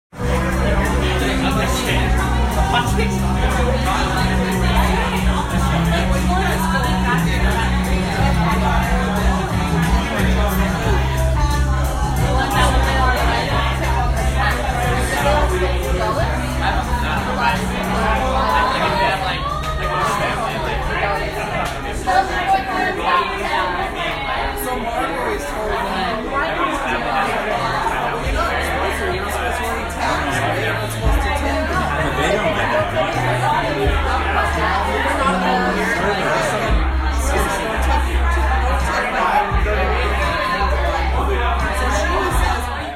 crowd - bar 1
crowd - bar - electric bicycle vancouver